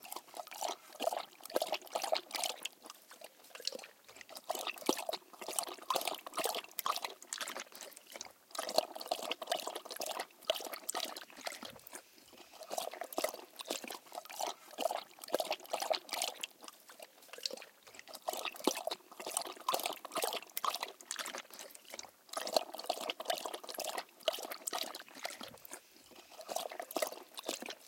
Dog lapping up water from a bowl in the yard. Please write in the comments where you used this sound. Thanks!
liquid, gurgling